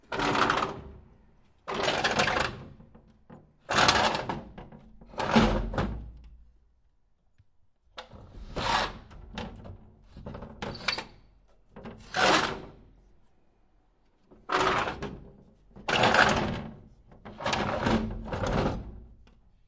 window metal heavy slide open close creak brutal on offmic
close, creak, heavy, metal, open, slide, window